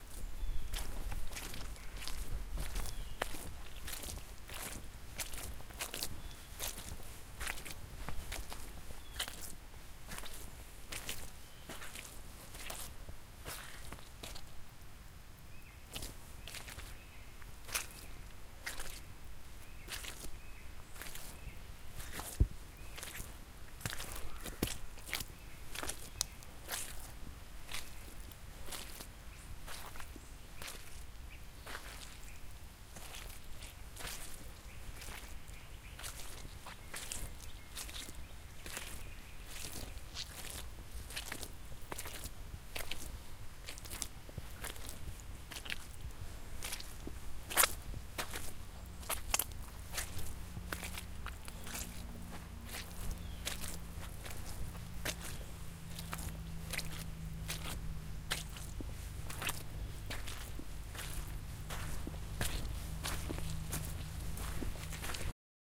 Stomping Mud - Gross
This recording is honestly more amusing than good. I was walking on a trail at Victoria Bryant Park when I found a part that was flooded. I tried to go around it, and accidentally stepped in some mud. So I took a minute to record myself walking in it.